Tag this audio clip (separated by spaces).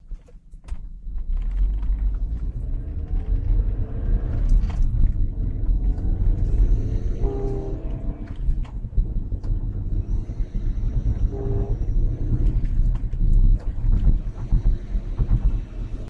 a4 audi quattro turbo